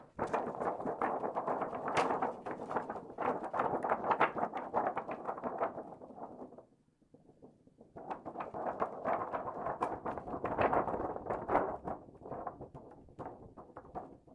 Thick paper being brought up and down by a person.